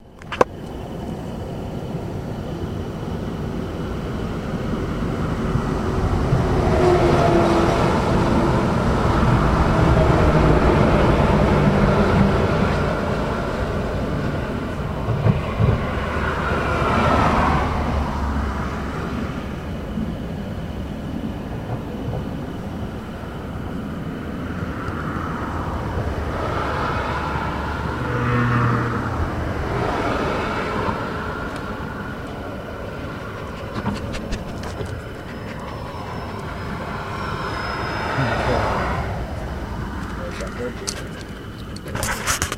highway to hell on mushrooms on a bridge